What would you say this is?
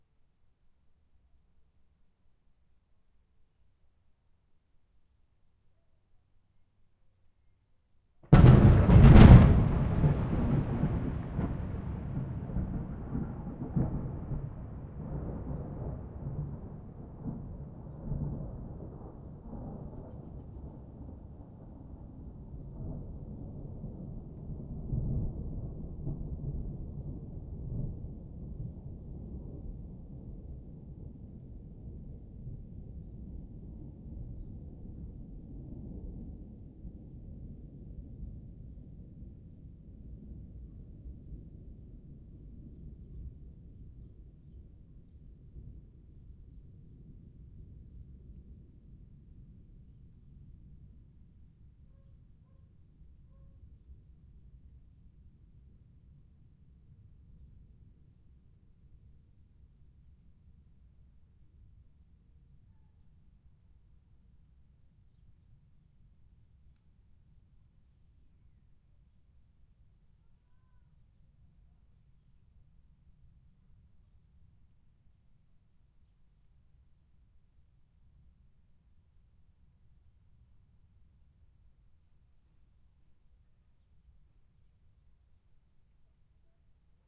Single huge clap of thunder
Single clap of thunder. Zoom H5, internal capsules.